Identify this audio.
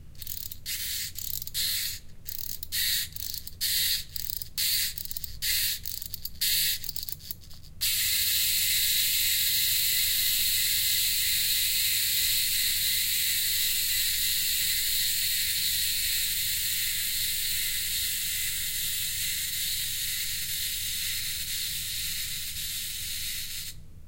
windup angel
an angel that flaps it's wings when wound up... quite lofi recording as there's no way to make a recording in my house without hearing the cooling fans outside. recorded with binaurals.
angel, flap, flickr, windup, wings